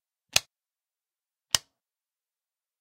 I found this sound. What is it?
WALL PLUG SOCKET SWITCH
A UK 13A wall plug swich, on and off
domesticclunk, UK, off, click, 13A, british, wall-plug, switches, electricity, switch, electric